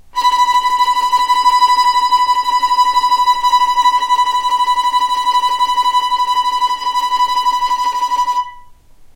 violin tremolo B4
tremolo, violin